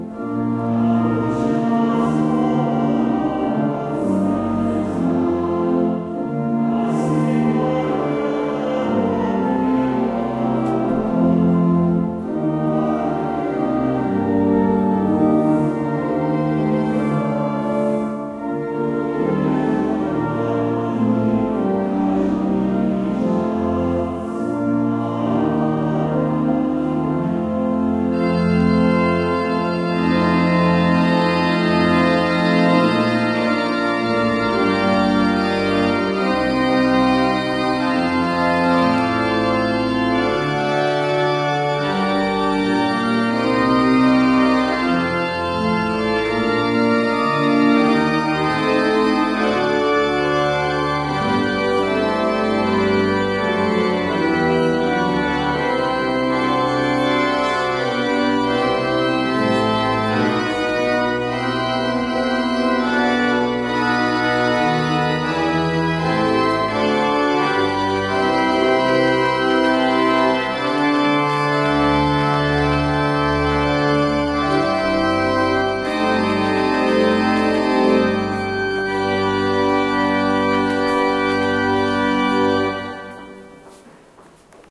Brno Church service edit
The end of a hymn with some singing and a nice organ finale recorded just as I entered a church in the centre of Brno on a Saturday evening.